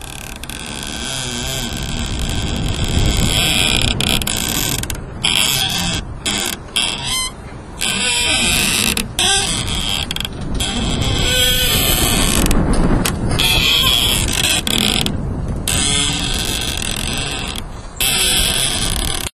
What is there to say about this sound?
thuja squeaking in wind
thujas squeaking in the wind and rubbing against a wooden fence not-processed. recorded with a simple Olympus recorder
tree, thuja, squeak, wind